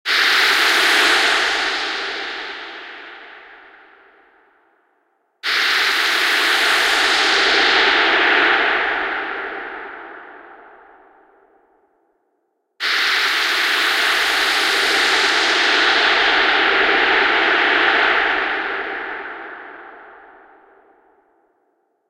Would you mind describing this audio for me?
A transition from a "bubbly" sound to noise, with a big reverb. Created using Logic synth Hybrid Morph.